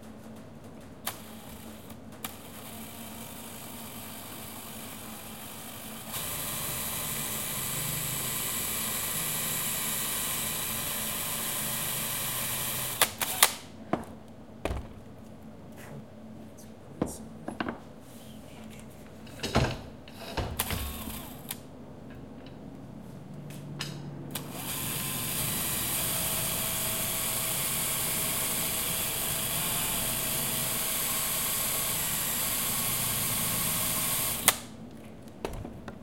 Drilling on workbench
Small hand drill piercing through metal rods
drill, drilling, electric, electric-tool, industrial, machinery, mechanical, motor, shop